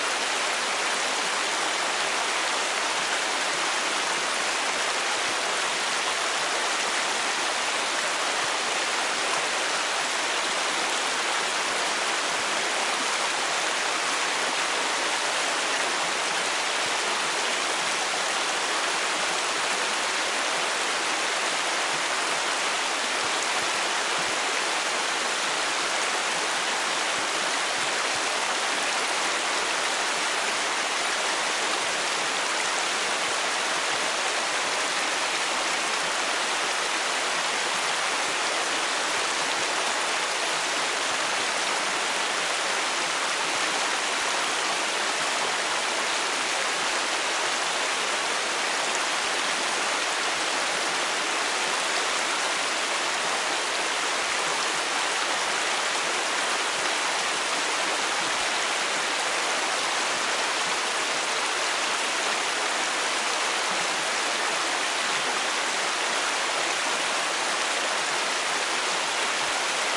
water stream busy nearby from hill
nearby, stream